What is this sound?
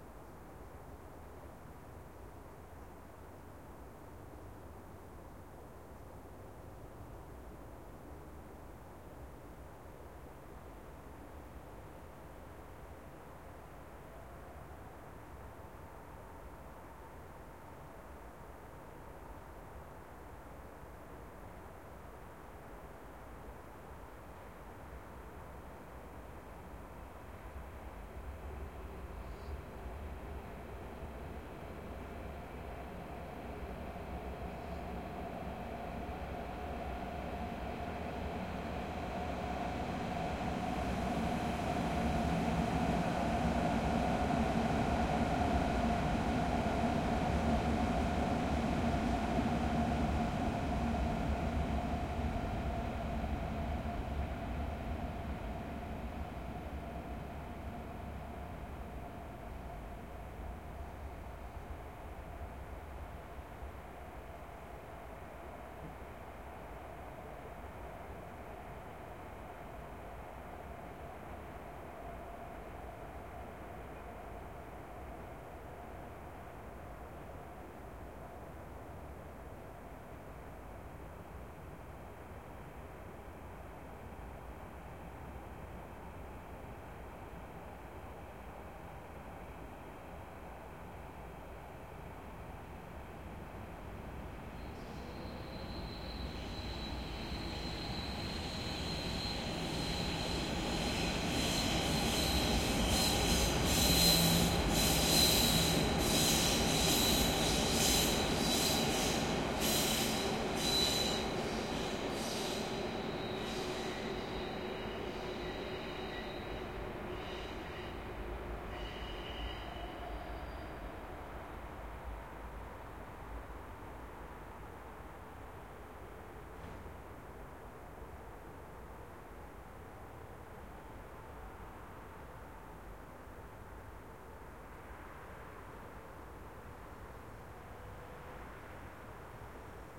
S-Bahn Berlin - 2 S-Bahn trains passing in 10m distance
2 S-Bahn trains passing by, ca. 10m away on an elevated train track typical for Berlin.
Some general noise but overall rather clean from other sounds.